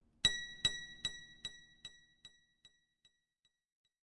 plucking a guitar string with a bit of delay added